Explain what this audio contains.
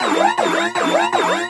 Another alarm sound.
warning, emergency, klaxon